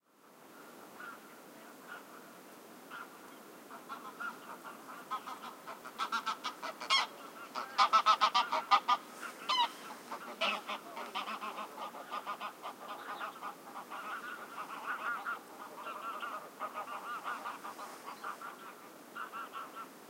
Geese fly over my head 2
Geese fly over my head. I did some recordings at Målsjön in Kristdala Sweden, it`s a bird-lake. It´s done in 2nd of april.
microphones two CM3 from Line Audio
And windshields from rycote.
Bird, Sounds, ambiance, ambience, ambient, bird-sea, birds, birdsong, field-recording, flying, geese, general-noise, goose, nature, spring, wings